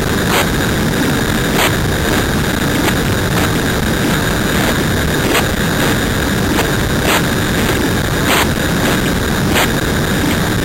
Circuit bent speak n spell toy (so much fun...so easy to make.) Simply recorded into computer thanks to the headphone output.